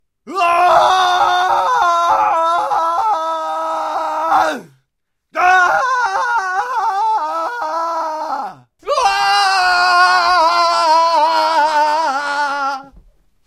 Robin - Scream
Scream of pain, falling or other emotions of a character in a war video game.